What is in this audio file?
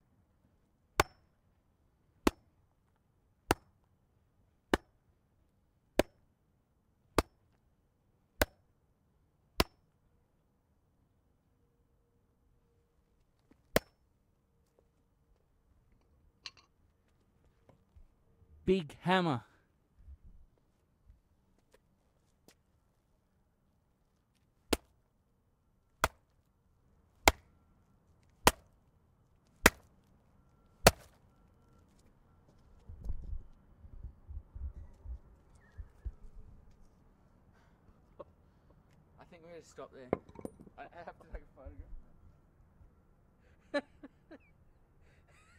pig head hammer
bash, field-recording, hammer, head, pig